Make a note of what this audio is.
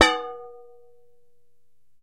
hit - peanut can 12
Striking an empty can of peanuts.